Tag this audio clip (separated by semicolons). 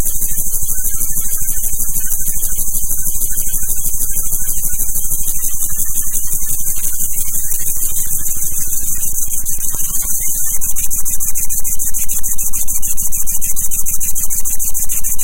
animation; stereoscope; sfx; effect